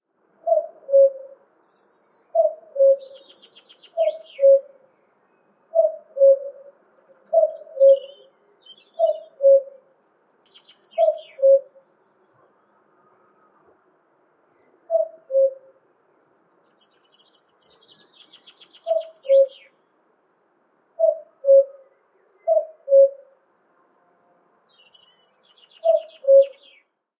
A dual mono field-recording of a distant Common Cuckoo (Cuculus canorus) calling in spring. Rode NTG-2 > FEL battery pre-amp > Zoom H2 line in.
bird, cuckoo, field-recording, cuculus-canorus, birds, mono